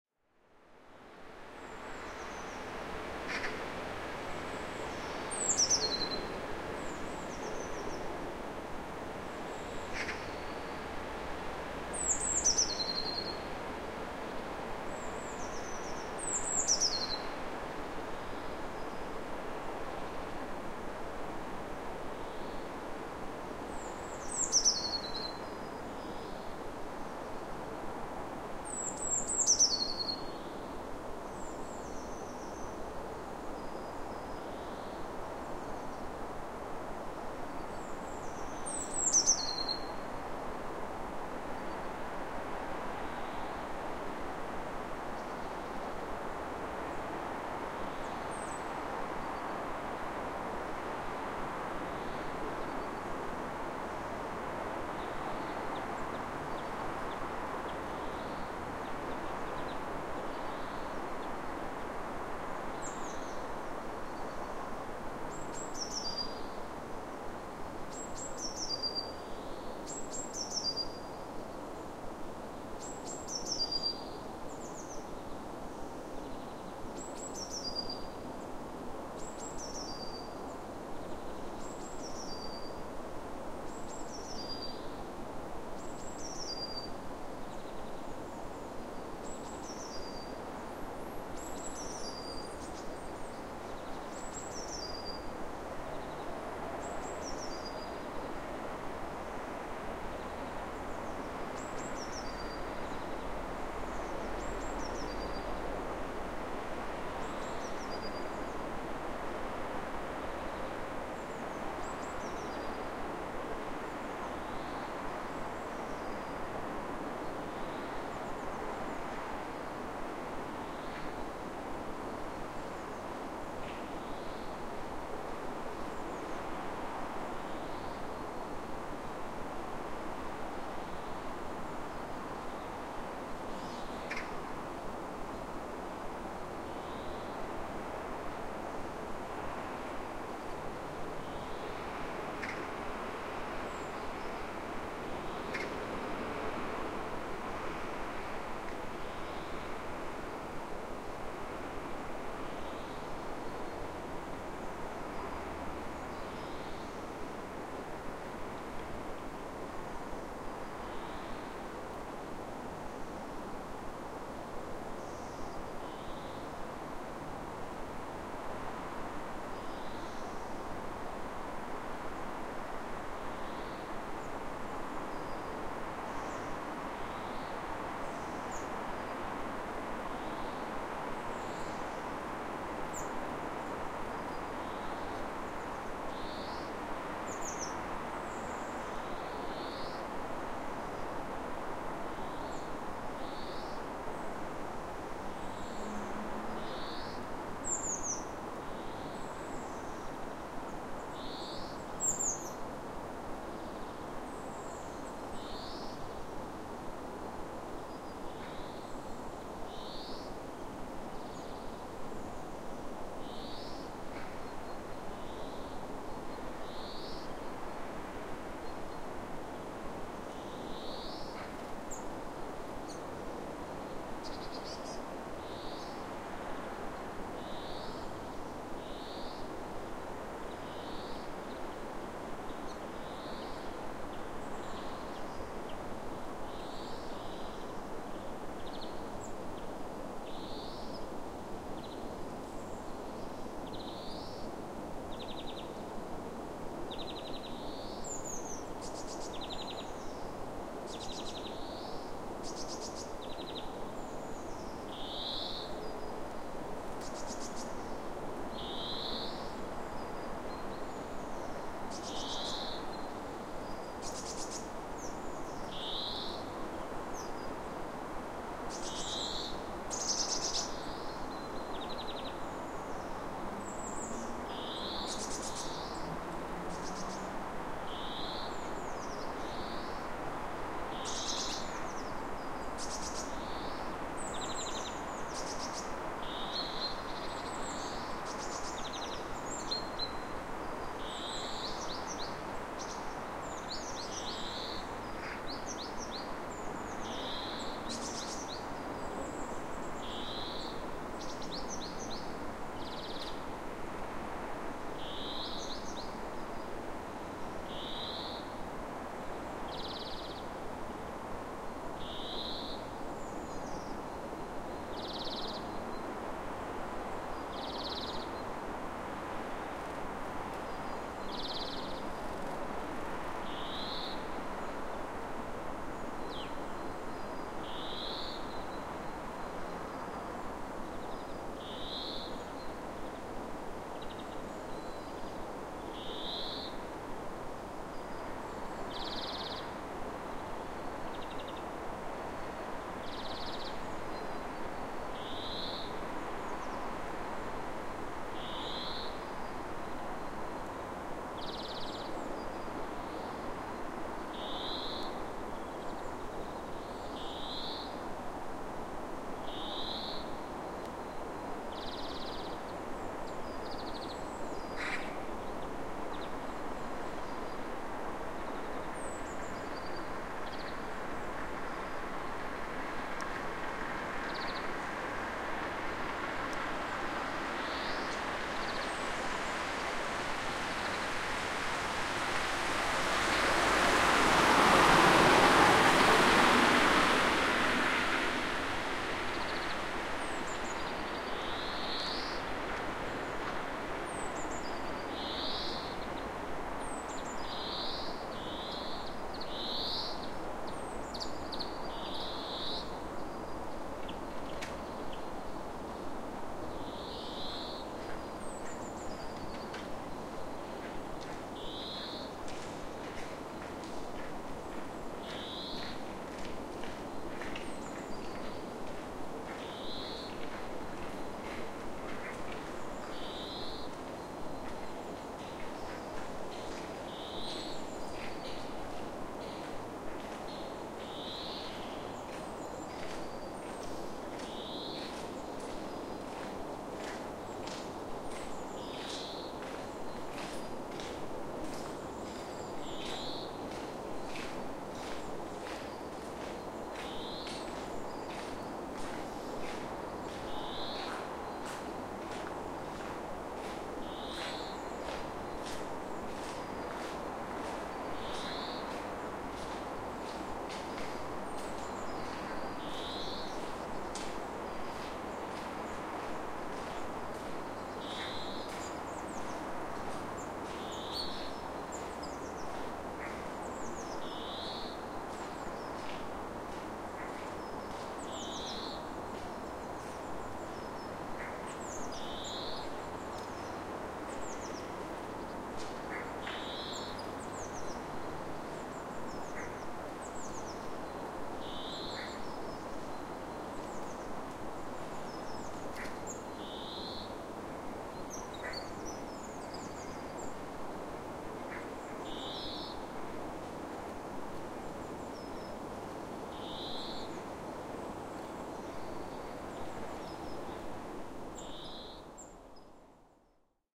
Birds and wind
Wind and birds singing, some cars and people passing by in the distance.